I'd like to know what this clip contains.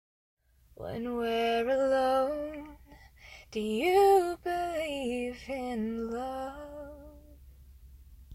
'Do you believe in love'
A female voice singing a line that could be used in a song. :) (Sorry for lack of detail, I'm pretty busy nowadays)
female, singing, voice, lyrics, vocal, girl, song